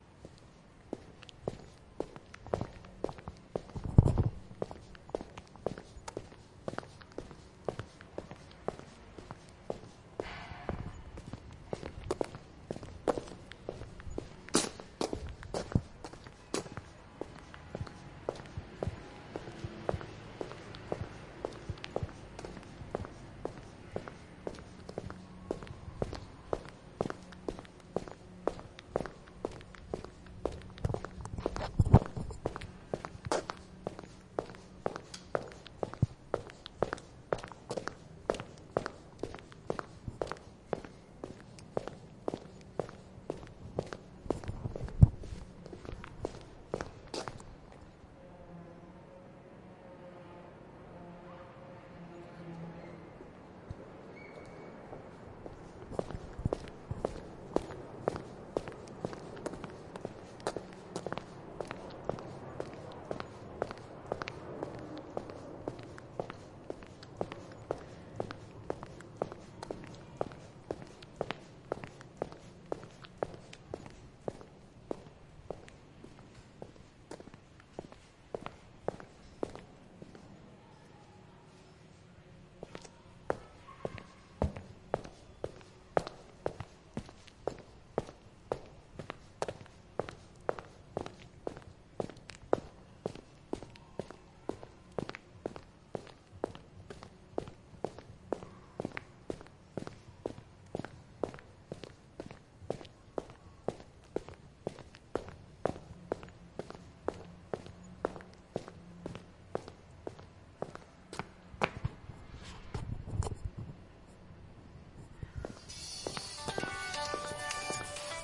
Various paces walking in an underground car park with smart shoes
Underground, ambience, car, foot, steps